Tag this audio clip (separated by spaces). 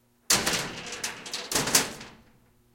cabinet military